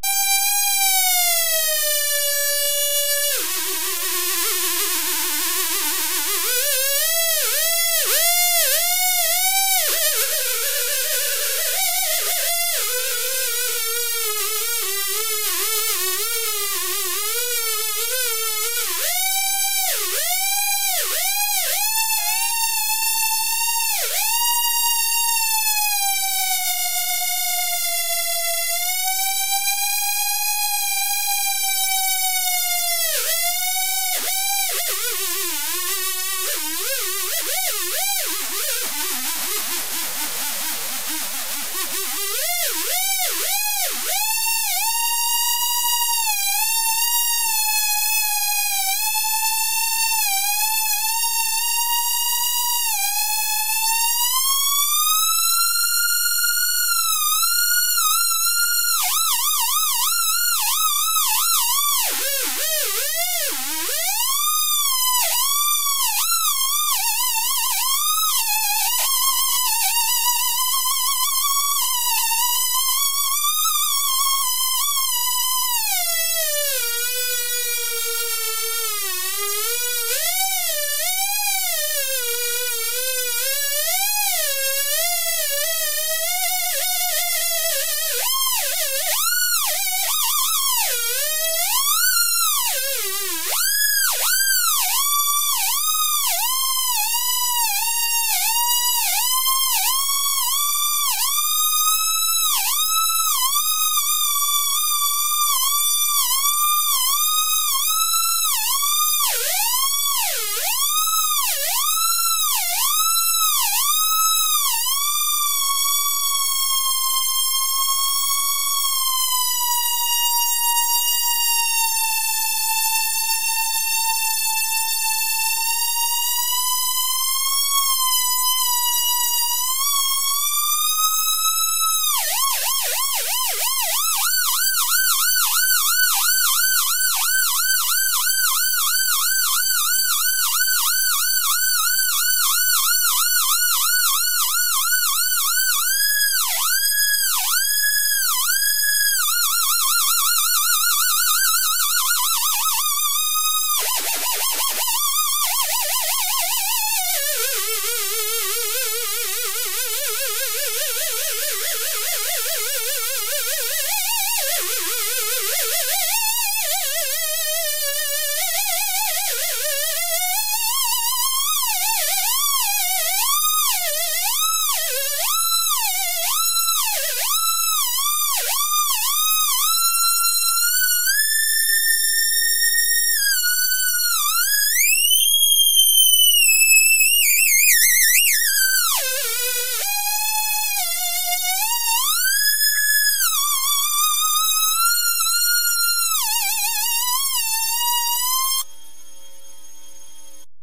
Som feito a partir de uma circuito com chip40106, ldr, resistor, capacitor, jack e switch.